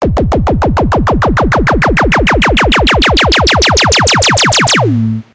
cyber laser
cyber, flash, laser